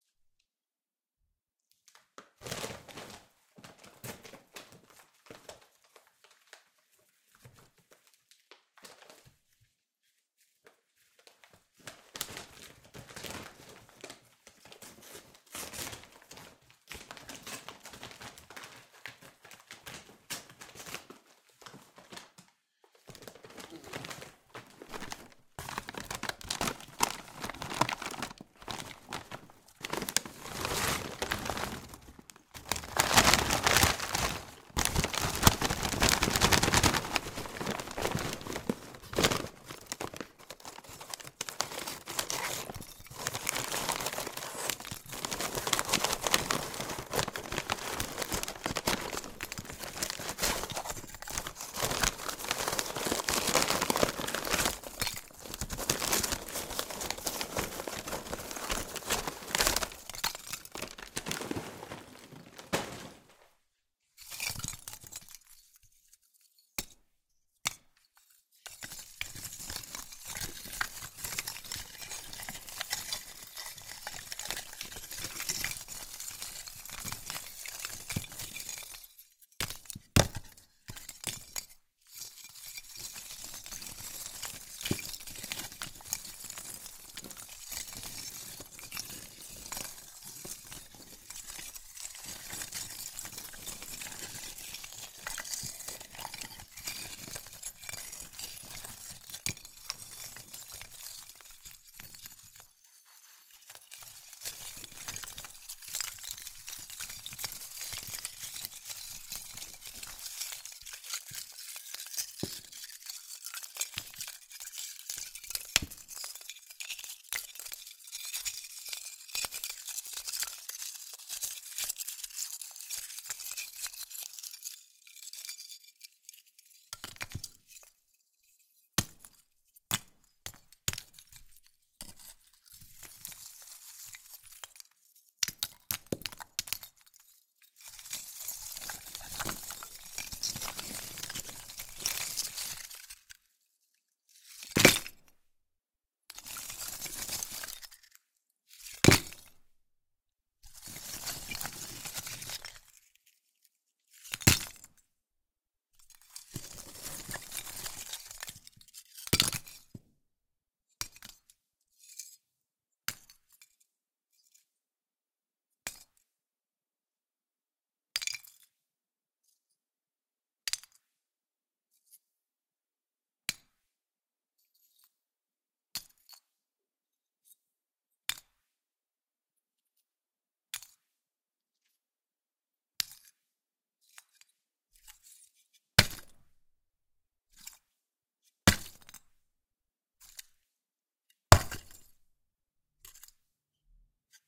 Charcoal placed into a makeshift recording bed/pallet with a fabric for dampening. Recorded with ZoomH5 at 96Hz. Raw file recordings with a bit of noise reduction.